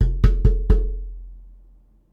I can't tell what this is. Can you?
Water cooler bottle x4
Bang the water cooler bottle (19 L) 4 times.
boom, coller, office, office-cooler, plastic-bottle, water-cooler-bottle